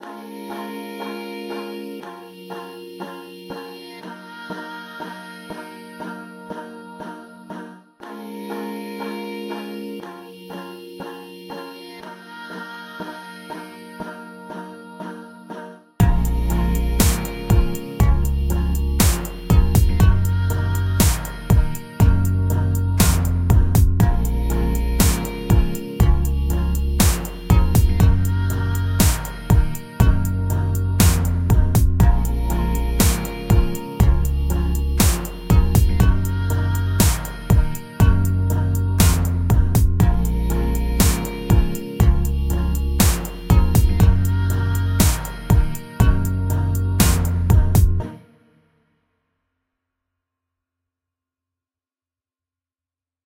That scary place (loopable)
A collection of loop-able sounds from MSFX’s sound pack, “Cassette ‘One’”.
These sounds were sampled, recorded and mastered through the digital audio workstation (DAW), ‘Logic Pro X’. This pack is a collection of loop-able sounds recorded and compiled over many years. Sampling equipment was a ‘HTC Desire’ (phone).
Thank you.